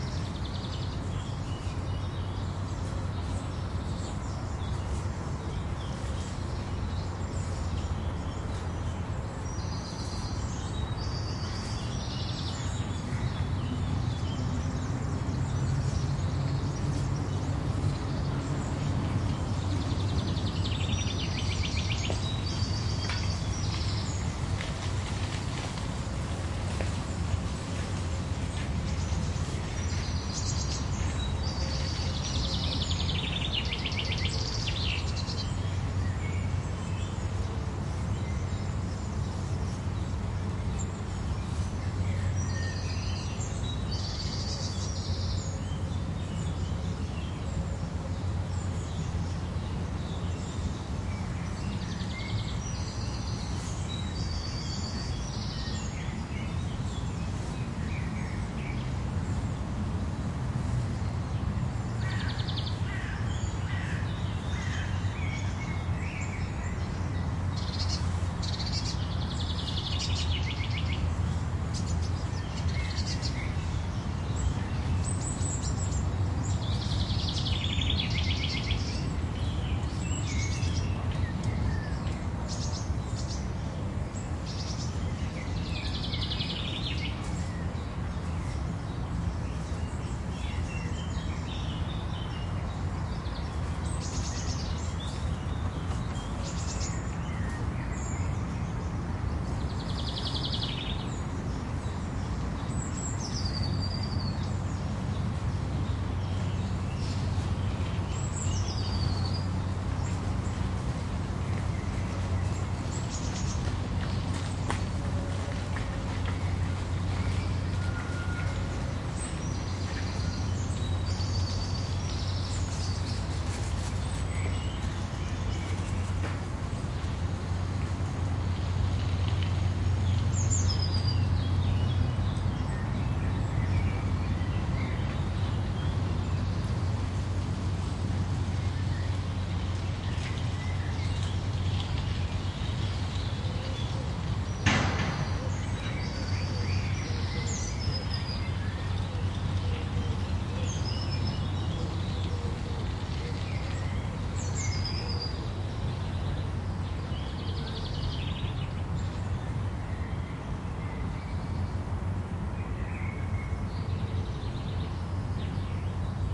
140614 LpzPark Center R
Field recording in the center of a city park between the boroughs of Lindenau and Schleussig in the German city of Leipzig. It is early morning on a fine summer day, birds are singing, and people making their way to work or where ever on the gravel paths, walking, jogging or on their bikes. Distant city traffic can be heard in the background.
These are the REAR channels of a 4ch surround recording, conducted with a Zoom H2, mic's set to 120° dispersion.
ambiance, ambient, atmo, atmos, atmosphere, backdrop, background, birds, city, Europe, field-recording, Germany, Leipzig, park, peaceful, soundscape, summer, surround, urban